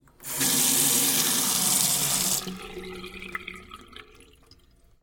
sink water bathroom3
Bathroom sink. Tiled walls and small. Faucet turns of, runs, turns off. You can hear the water draining.
Mic: Sennheiser MKH416